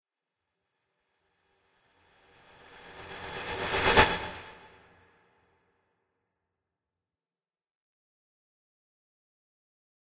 Riser sound crested in Ableton 11.
120 Bpm. F note.